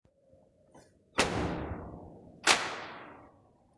pam pam
knock, pam, shoot